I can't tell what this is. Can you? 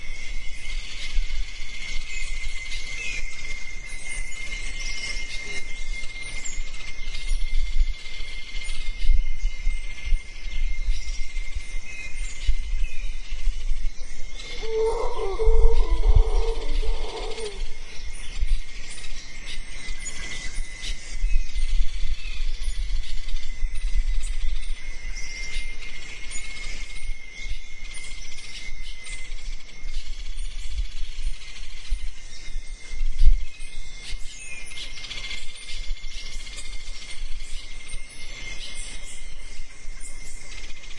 Tropical Dawn 2
2 of 6 series taken at Dawn in jungle of Costa Rica.
birds, dawn, ambient, monkeys, environment